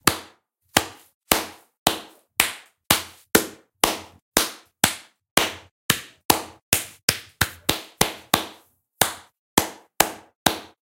A few hand claps that I captured using zoom h5
*this one was procesed a bit to make them sound a bit bigger
Clap, Drums, field, Hand, recording, room, Zoom